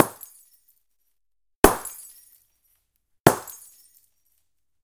Exploding lightbulb 1
Dropping a big lightbulb, exploding on impact spreading the glass across the whole room.
Recorded with:
Zoom H4n on 90° XY Stereo setup
Zoom H4n op 120° XY Stereo setup
Octava MK-012 ORTF Stereo setup
The recordings are in this order.
breaking, dropping